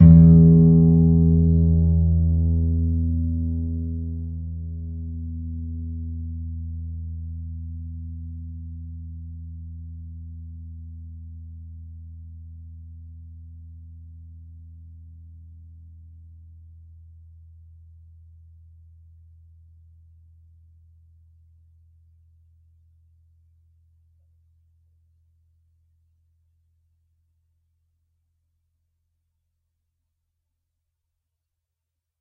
Clean E str pluck
Single note, plucked E (6th) string. If there are any errors or faults that you can find, please tell me so I can fix it.
single-notes, guitar, nylon-guitar, acoustic